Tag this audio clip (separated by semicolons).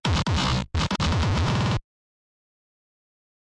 deathcore; e; glitchbreak; h; l; love; o; pink; processed; t; y